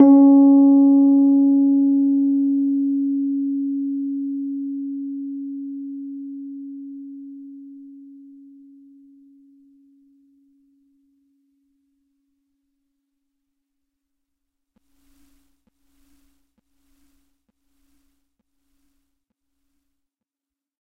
Individual notes from my Rhodes. Each filename tells the note so that you can easily use the samples in your favorite sampler. Fender Rhodes Mark II 73 Stage Piano recorded directly from the harp into a Bellari tube preamp, captured with Zoom H4 and edited in Soundtrack.